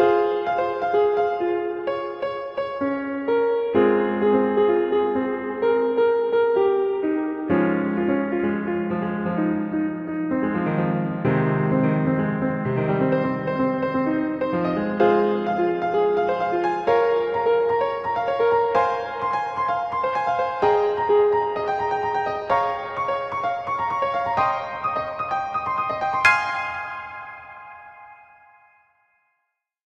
Repose Lost Melody
Lost melody of an old trance track. Very spacey and lots of reverb.
[BPM: ]
[Key: ]
Repose, Ethereal, Chords, Melody, Lost, Loop, Music, Arp, Electronica, Piano, Reverb, Dance, Trance, Lead, Spacey, Space, Atmosphere